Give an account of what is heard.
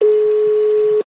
Phone call sound from speaker.
call, cellphone, mobile, phone, ring